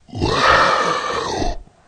dragon roar distressed 1
Dragon sound created for a production of Shrek. Recorded and distorted the voice of the actress playing the dragon using Audacity.
beast, monster, creature, roar